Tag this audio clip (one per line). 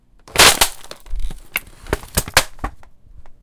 break
container